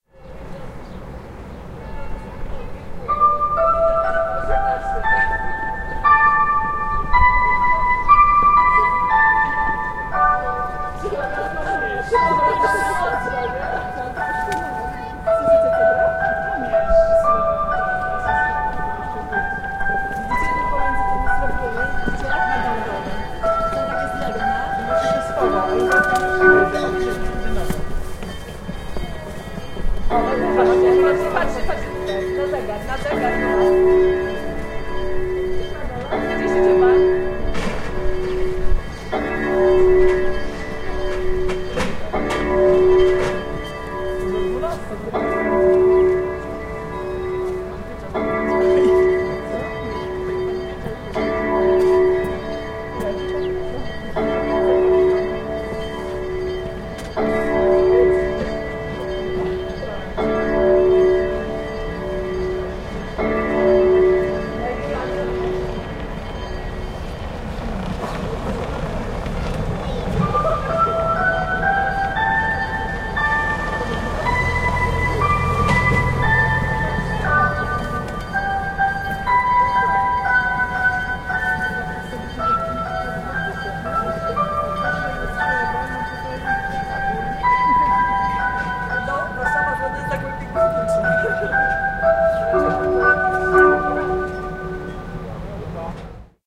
Zegar-Piekarska-20-Warszawa
Zegar z warszawskiej starówki przy ul. Piekarskiej 20
Warszawa, Kuranty, clock, bell, Zegar, time